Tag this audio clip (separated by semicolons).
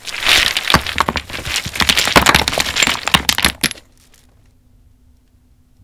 dragging; dropping; rocks; gravel